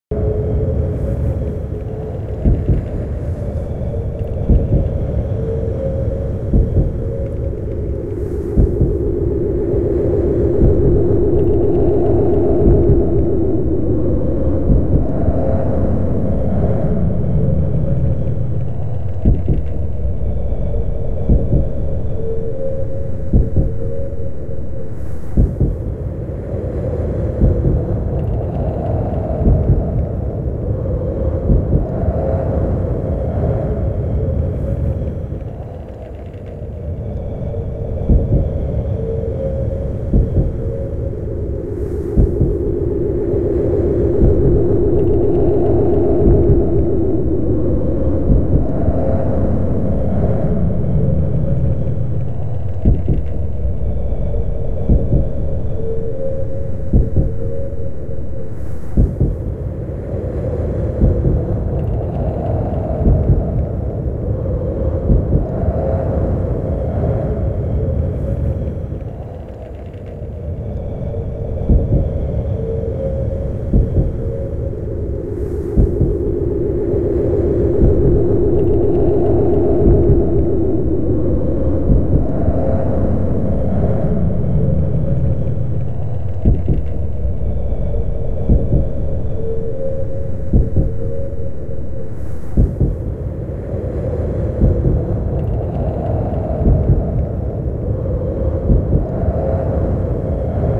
Horror ambient soundscape loop
This track's name is "I want to believe" :)
I made this music in 2004 for a study project in school. The game was sort of a 2D horror and exploration game. I remember that we wanted "generative" music in the sense that several layers overlapped according to the actions.
It loops.
sinister, soundscape, alien, ambient, suspense, fear, atmosphere, loop, threat, creepy, ufo, soundtrack, spooky, music, dark, film, scary, background, game, horror, sound, dramatic, haunted